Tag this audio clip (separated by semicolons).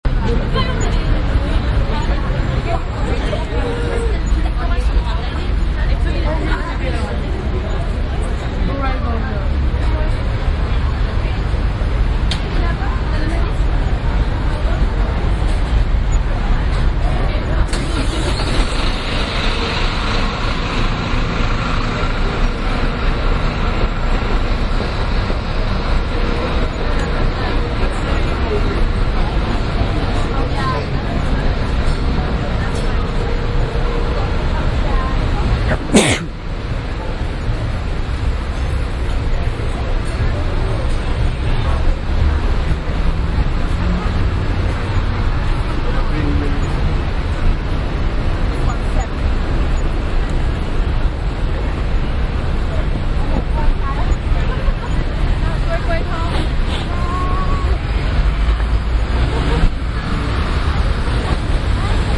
ambience; ambient; atmosphere; ambiance; background-sound; london; city; general-noise; soundscape; field-recording